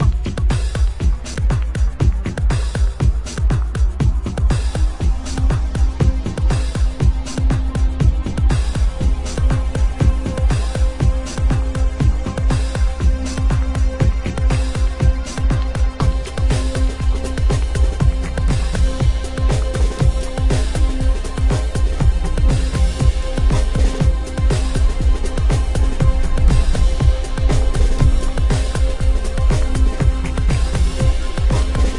beat trticombo 4
Where did we go? more techno ambient in suspension. sintetizer and drum samplers. Logic